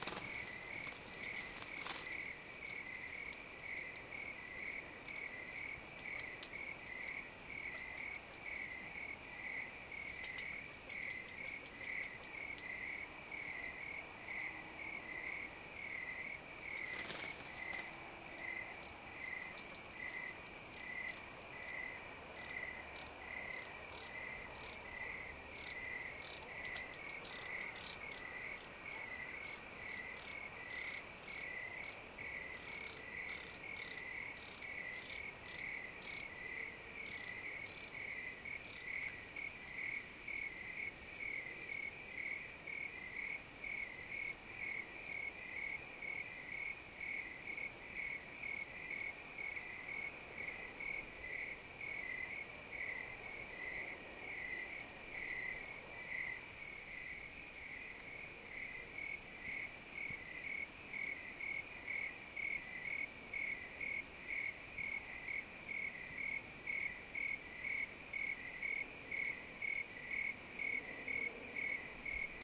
Crickets&Quail
Crickets and Quail after dark. California Hills.
insects, Quail, Nature, Night, Crickets